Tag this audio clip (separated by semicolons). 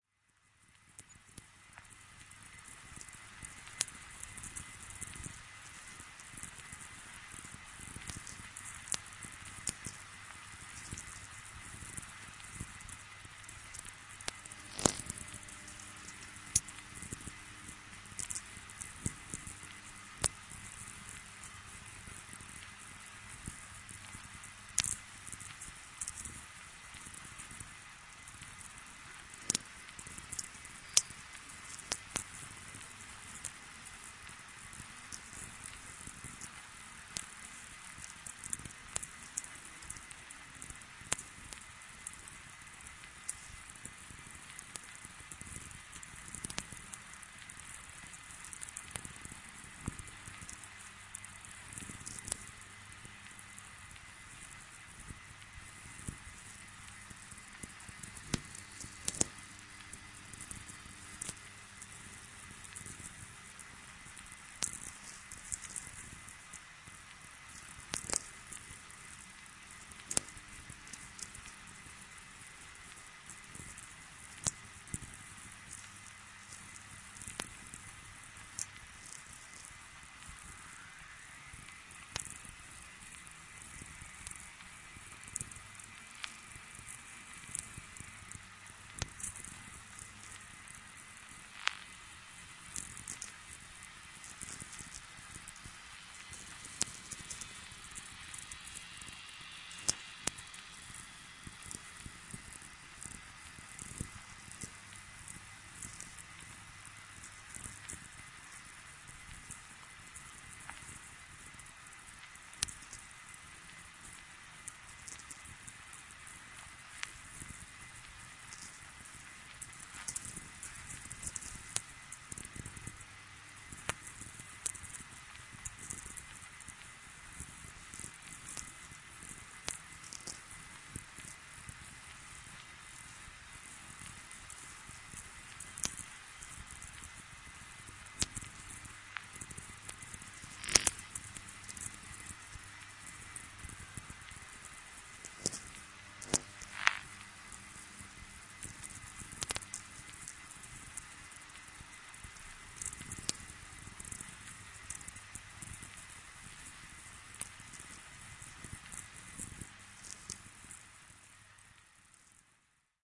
noise; shortwave; static; vlf